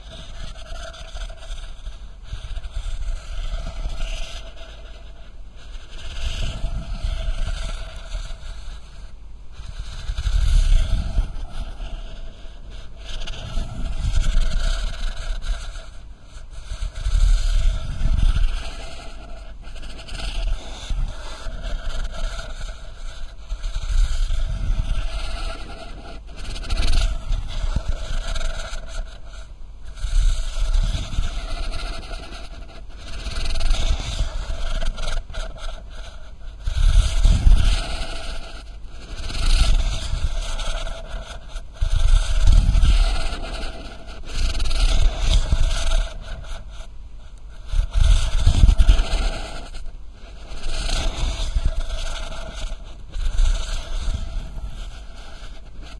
Terrible snore
A snoring sound I accidentally made when messing with the sound of a cardboard tube scratching against a metal mesh. Sounds like the person (or a dragon :P) is almost choking in their sleep.
breathing, snore